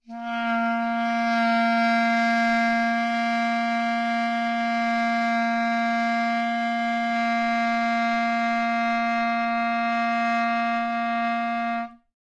A long held Bb. Ive been using these samples for spectralist acousmatic stuff.